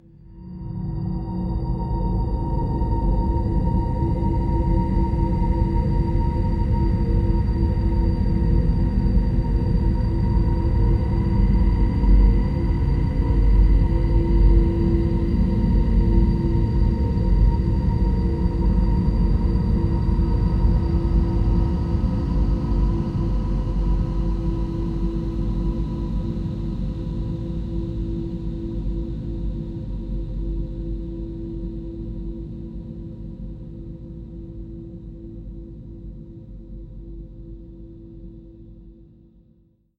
LAYERS 023 - Thin Cloud-94
LAYERS 023 - Thin Cloud is an extensive multisample packages where all the keys of the keyboard were sampled totalling 128 samples. Also normalisation was applied to each sample. I layered the following: a thin created with NI Absynth 5, a high frequency resonance from NI FM8, another self recorded soundscape edited within NI Kontakt and a synth sound from Camel Alchemy. All sounds were self created and convoluted in several ways (separately and mixed down). The result is a cloudy cinematic soundscape from outer space. Very suitable for soundtracks or installations.
cinimatic, cloudy, multisample, soundscape, space, pad